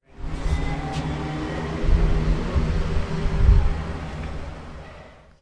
main door
Difference of sounds between library lobby and outside, by opening the main entrance door.
Recorded at the comunication campus of the UPF, Barcelona, Spain; in library's lobby.
campus-upf, door, door-opening, library, main-door, open, open-door, sound-abient-change, UPF-CS12